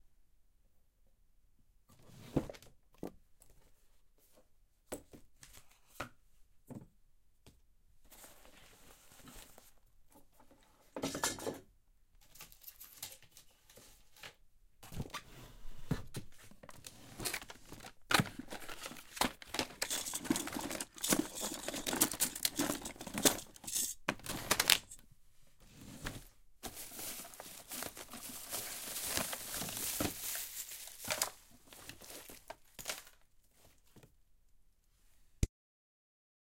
Opening and going through a domestic drawer 2
Going through the contents of a domestic drawer.
realistic, domestic, sfx, foley